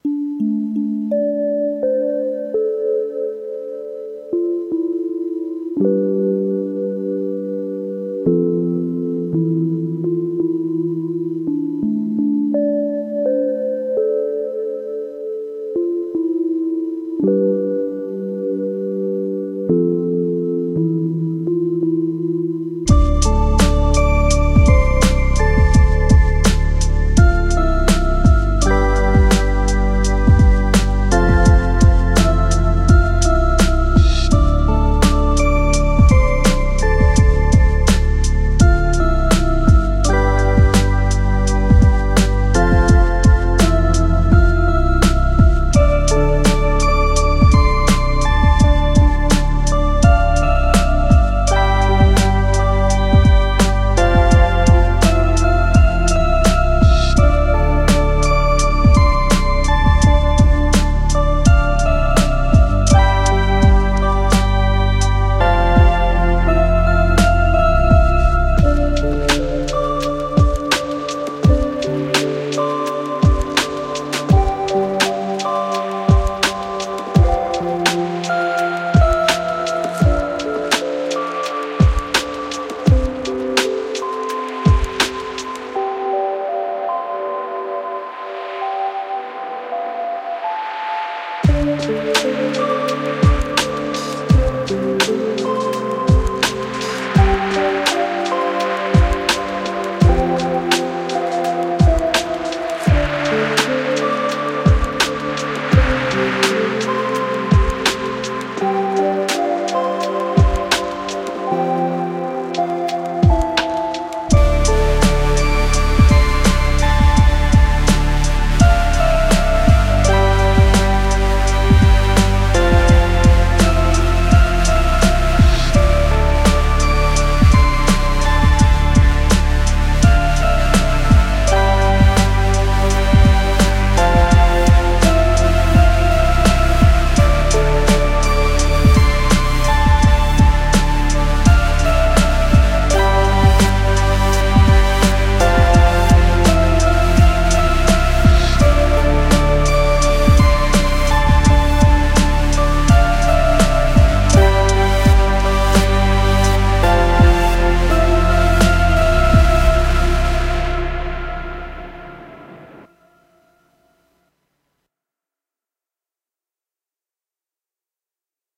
Created with:
Korg Minilogue XD
Moog Mother 32
Misc Drum Samples
January 2020
psychedelic, oregon, groove, hop, percussive, beat, synth, experimental, portland, electronic, dark, evolving, electro, downtempo, analog, drum, loop, lofi, house, live, quantized, korg, chill, hip, noise